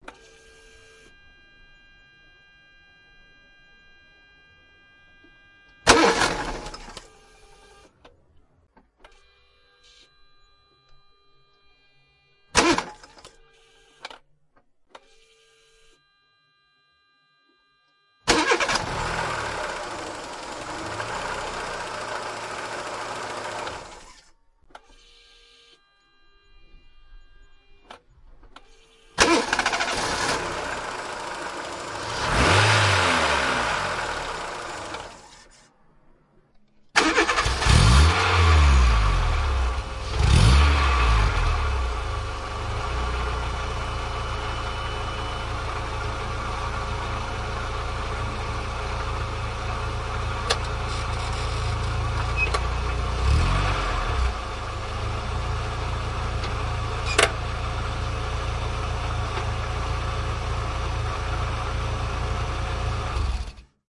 Car Ignition
Starting a Volkswagen Polo. The first couple of times the engine fails to start. The microphone was located underneath the car close to the engine to capture as much detail in the sound as possible.
Recorded using a Sony ECM-MS957.